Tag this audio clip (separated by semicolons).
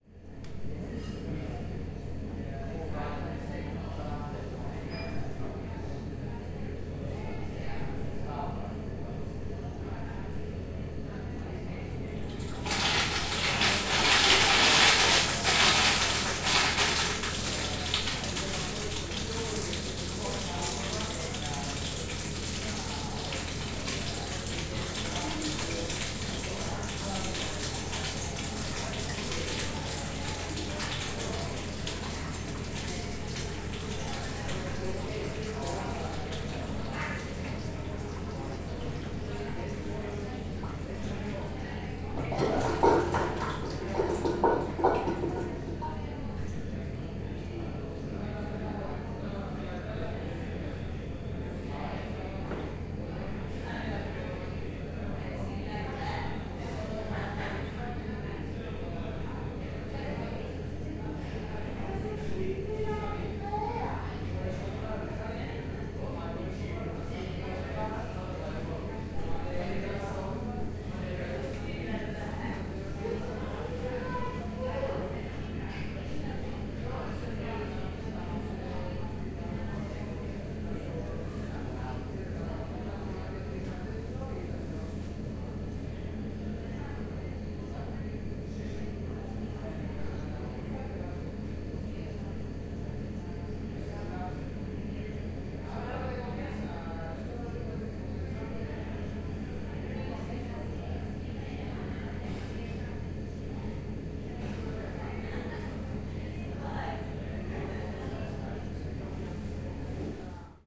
flush; Lavatory; pub; toilet; urinal